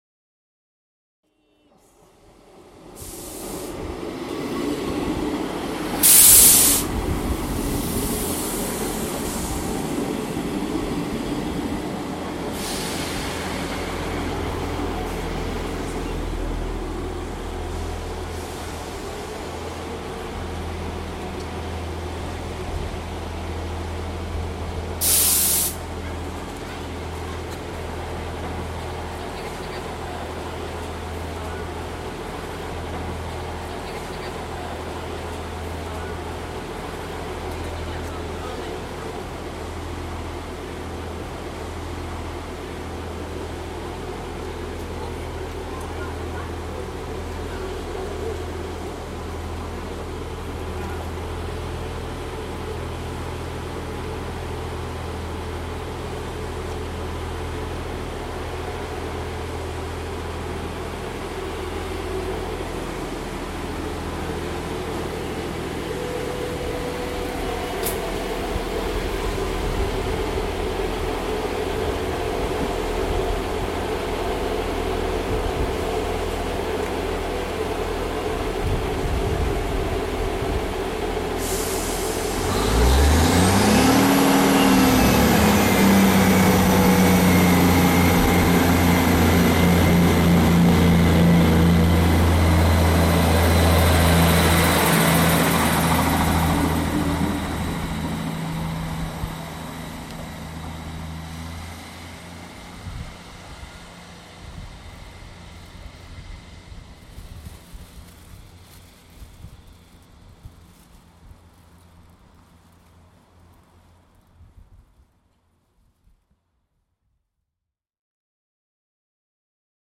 Recording of a train arriving at a station, remaining in the station and then leaving. Note- There is some minor wind noise.
This recording took place in November of 2015 at Meadowhall train station. Recorded with a ZOOM H2next portable mic.